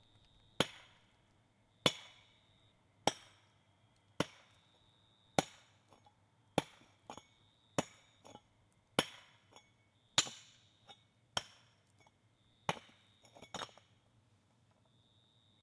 Pick axe striking rocks #1
Pick striking rocks at road cutting, Leith, Tasmania. Recorded on a Marantz PMD 661 with a Rode NT4 at 11:30 pm, 15 Feb 2021, Take 1
Field-recording, shovel, pick-axe, dig, rock